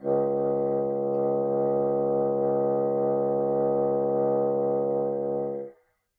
One-shot from Versilian Studios Chamber Orchestra 2: Community Edition sampling project.
Instrument family: Woodwinds
Instrument: Bassoon
Articulation: sustain
Note: C#2
Midi note: 37
Midi velocity (center): 31
Microphone: 2x Rode NT1-A
Performer: P. Sauter